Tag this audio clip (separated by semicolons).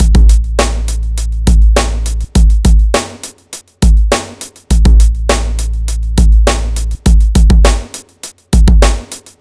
102; bass; beat; bpm; break; breakbeat; club; dance; drum; hard; hip; hit; hop; old; punch; sample; sequence; skool; thump